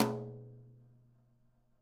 Drumkit using tight, hard plastic brushes.
brush, tom